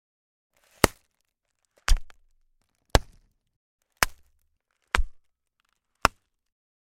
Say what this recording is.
Pops from popping air pouches
Pops from popping the plastic air pouches that come in packaging and shipments.
Recorded in my studio on a Neumann TLM 103 microphone.
silencer, laser-shot